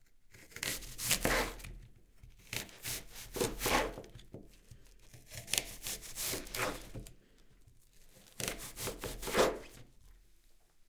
Cutting apples on a cutting board
I cut apples on a cutting board in front of two microphones
microphones 2 OM1(Line audio
apple, apples, background, chef, cook, cooking, cooking-food, Cut, cuttingboard, food, kitchen, knife